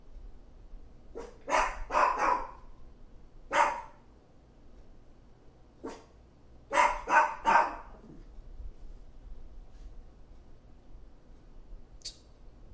small dog barking at a distance
Small dog barking. recorded at a distance.
Recorded using Zoom H2.
growl, bark, pet